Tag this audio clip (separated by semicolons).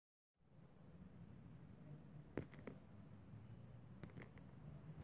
ambient; atmophere; recording